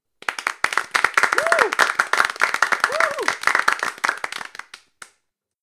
Applause Clapping
A crowd is applauding.